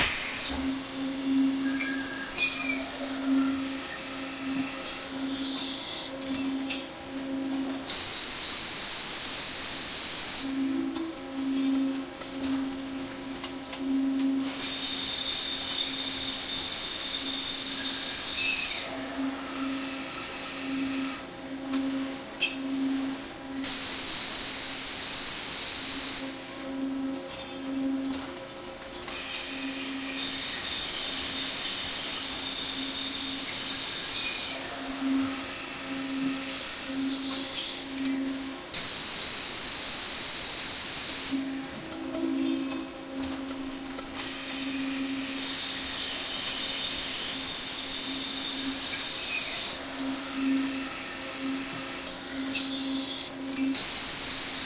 Factory sounds
machinery, mechanical, factory, industrial, hydraulics, machine, metal, field-recording, pneumatics